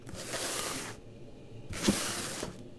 Sliding a plastic box across a surface